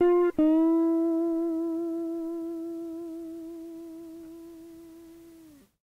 Tape Slide Guitar 15
Lo-fi tape samples at your disposal.